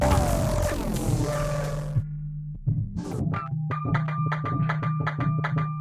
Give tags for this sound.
ambient
glitch
sound-design

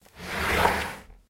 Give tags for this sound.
moving
pushing
table
take
transform

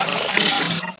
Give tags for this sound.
gross
vomit

